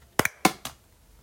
Tapa de plástico
Tapa de desodorante abierta de manera bruta y exagerada.
Foley, plastic, concrete